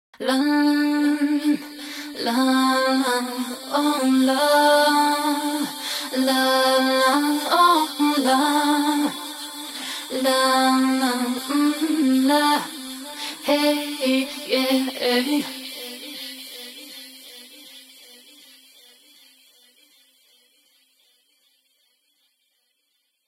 Female vocalist singing, a moment extracted from a track I was working on (heavily effected). It's at 120 bpm as you can see. Recording chain: Rode NT1-A (microphone) - Sound Devices MixPre (mic preamp) - Creative X-Fi soundcard.